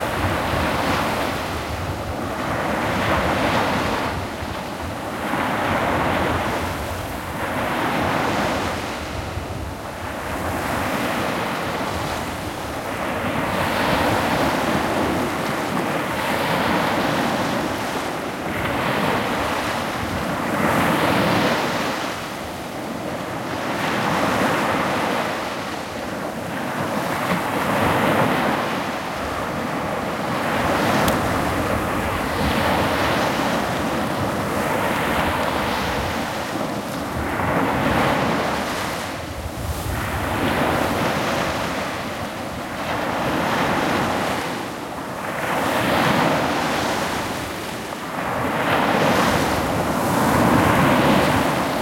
Waves and Bubbles recorded on the shore. recorded with Zoom H1.